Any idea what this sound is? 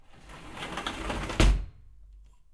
Door Sliding Closed